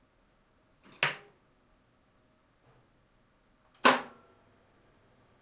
rotary switches boiler room
Stereo recording of two rotary on/off switches in a boiler room connected to some relay. Very decent quiet noise of the boiler room in background. Recorded from approx. 1,5m. Recorded with Sony PCM-D50, built-in mics, X-Y position.